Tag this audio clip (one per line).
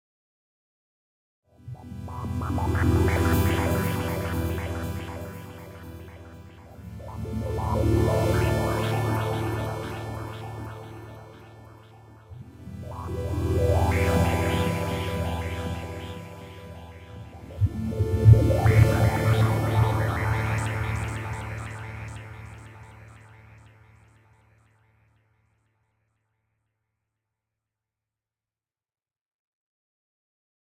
ambiance
hypno
sample
psy
analog
chord
electronic
trance
sound
dark
goa